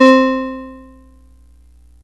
Fm Synth Tone 01